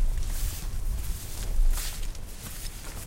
walking on grass
grass, walking